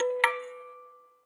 metal cracktoy crank-toy toy childs-toy musicbox
musicbox, childs-toy, metal, toy, cracktoy, crank-toy